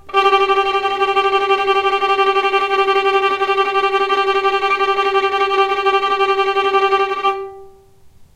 violin tremolo G3
tremolo, violin